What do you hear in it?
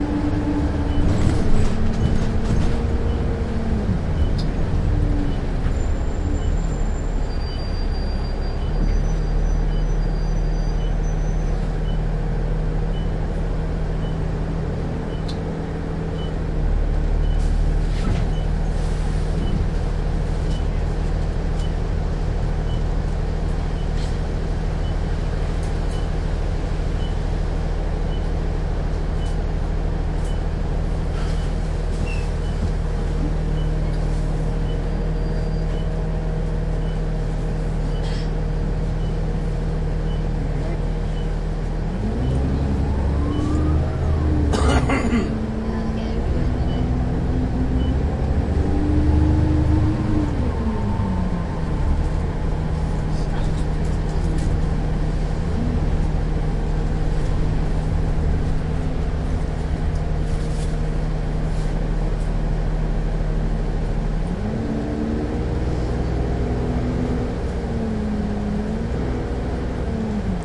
Interior bus stopping and setting off